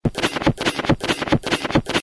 001 - Beat Nick
Accidental beat created in a samsung cell phone, using looper app, my voyce and body noises.
beat, dance, drum, drum-loop, electronic, groovy, improvised, loop, music, rhythm, weird